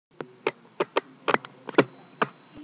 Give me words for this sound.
Touch platform2
This is a sound good for a platform touch.